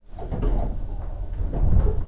Budapest Metro line 2. The train starts to go. A part of a high quality recording, made by my MP3 player.